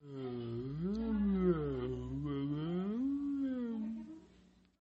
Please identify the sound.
28. Lenguaje Extraño
Language, France, Another